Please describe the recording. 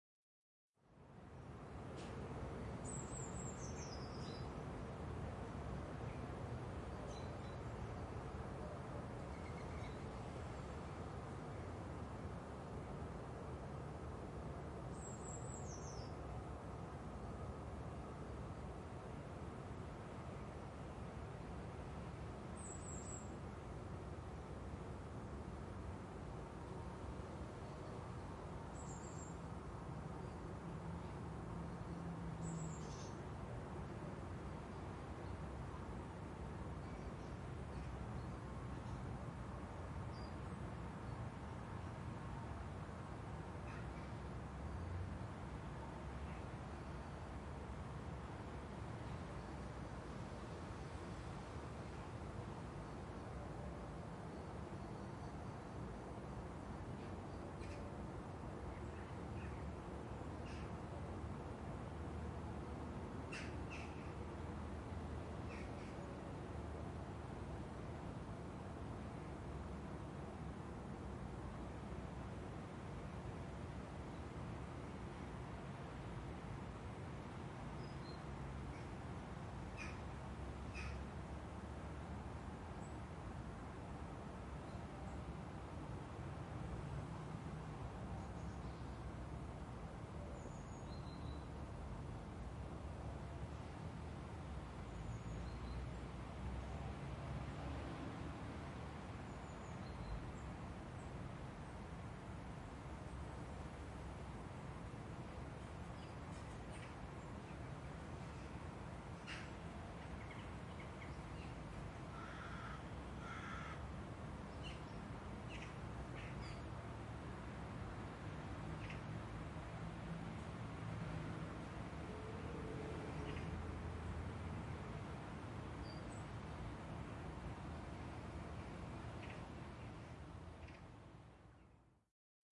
Morning suburban Zeist light traffic birds 02-10-2009
Zoom H4n X/Y stereo field recording of residential Dutch ambience.
traffic, general-noise, background, city, dutch, zeist, ambient, atmos, atmosphere, field-recording, morning, background-sound, ambiance, holland, netherlands, ambience, nature, birds, atmo, soundscape